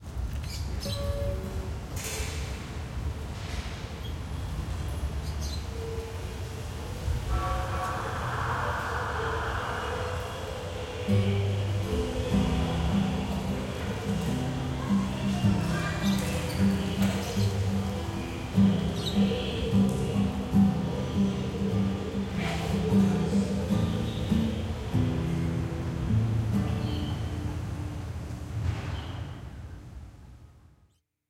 life in an HDB
city-life, government-housing, outside-apartment